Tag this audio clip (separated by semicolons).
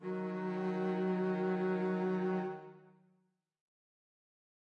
Bass; double; Music-Based-on-Final-Fantasy; Double-Bass; Samples